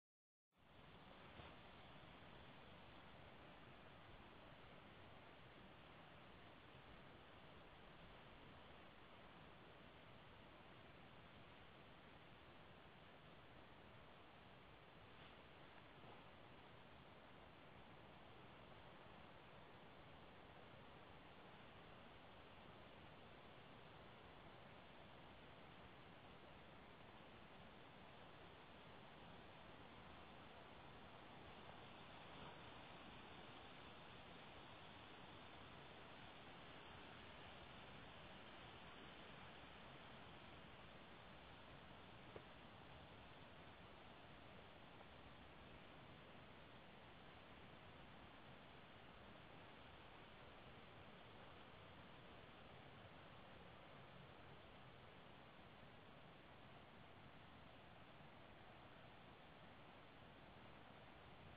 Breezy August day in Nuuksio forest.